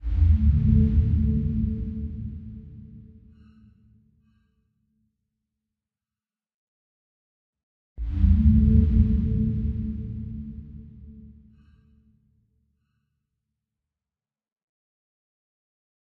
A dark processed sound, looped to 120 bpm